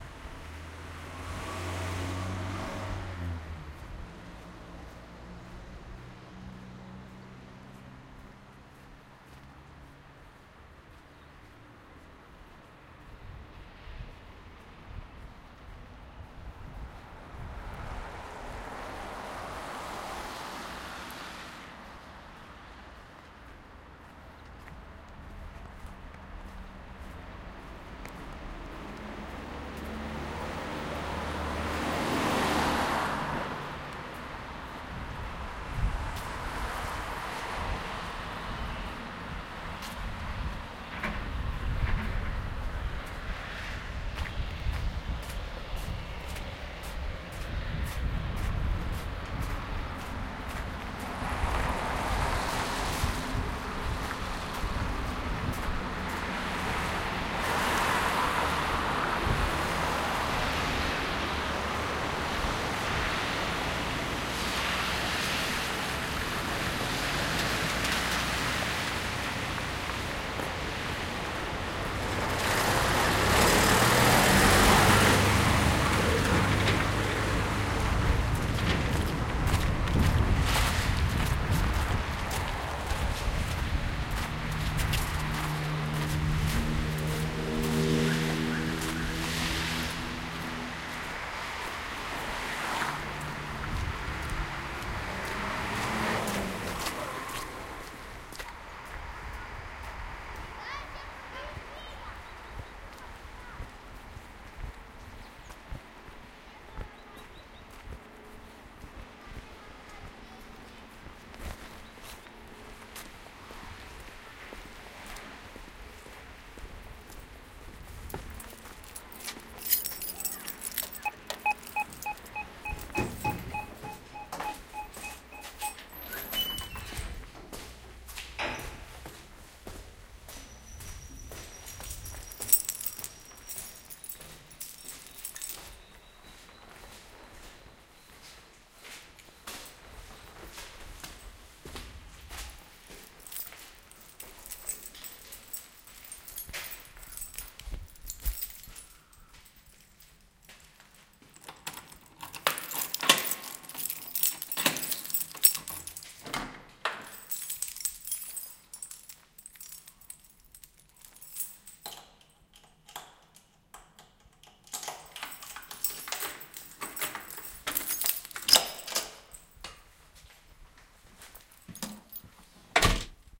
everything should be recorded. coming home
From ESBR-pack. A short walk down the street before coming home.
street, closure, steps, birds, doors, opening, machines, noise, keys